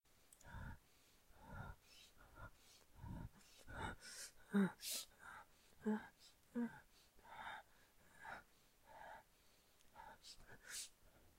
respiracion acelerada
free sound, efects persona respirando de manera acelerada
editing, effect, effects, generated, Recording, sound